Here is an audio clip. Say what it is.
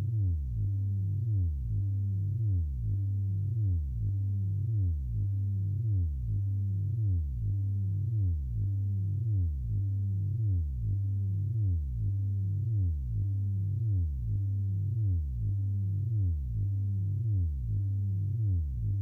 A series of sounds made using my wonderful Korg Monotron. These samples remind me of different science fiction sounds and sounds similar to the genre. I hope you like.
Machine, Space, Monotron, Space-Machine, Electronic, Korg, Futuristic, Sci-Fi